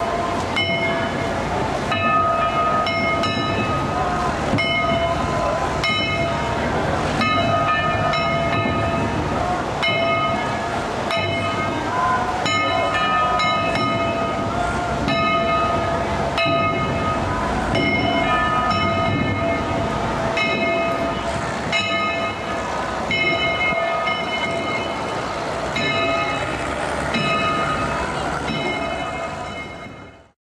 Distant bells and traffic
A recording made during the Tennjin festival in Osaka Japan. A boat playing bells recorded as it passes by under a busy bridge.